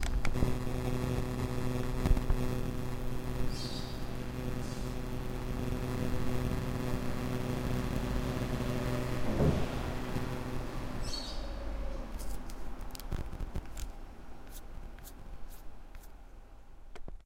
this is a recording of a sonicscape while there is an interference with the radio.
Is was recorded with Zoom H4.
interference, noisy, radio, sonicscape